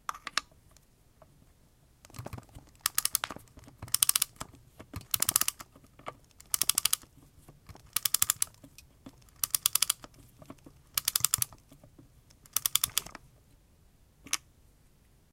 wuc key and windup
Manually winding up a wind-up clock. Nice rattle. Some noise from clock shifting as I turn the key, but as it seems nothing that can't be edited out.